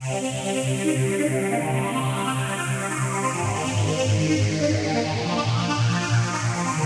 Chopped choir vox with delay and flanged fx
bass, beat, distorted, distortion, flange, hard, phase, progression, strings, synth, techno, trance